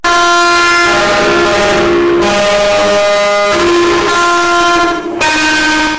This one was another part of the main "Lullaby" Line. It was supposed to sound somewhat like a lullaby.